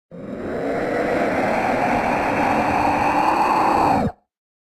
Generic roar
Inspired by the Monster Hunter videogame franchise. Made these sounds in Ableton Live 9. I want to get into sound design for film and games so any feedback would be appreciated.